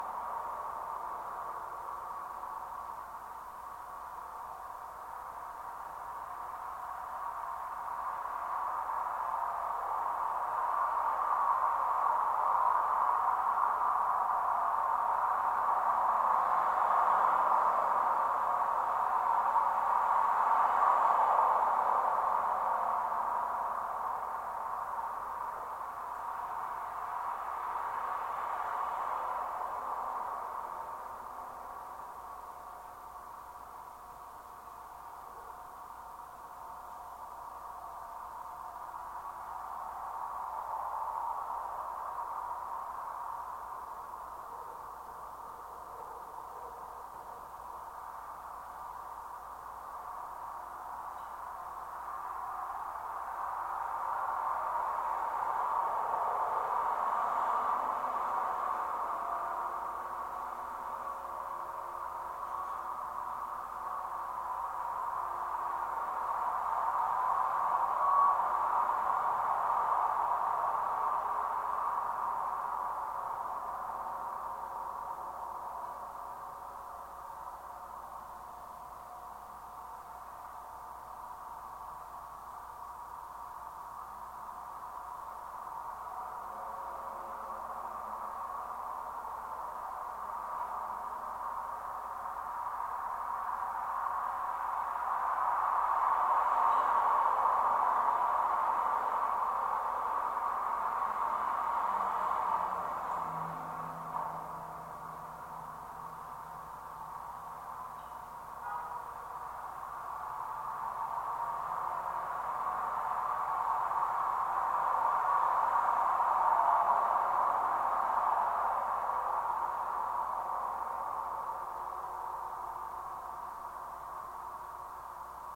SC Agnews 01 power pylon
Contact mic recording of a power pylon (marked PLM NAJ 1) on Lafayette Street in Santa Clara, California, in the Agnews district by the old sanitarium. Recorded July 29, 2012 using a Sony PCM-D50 recorder with a wired Schertler DYN-E-SET contact mic. Traffic noise, resonance.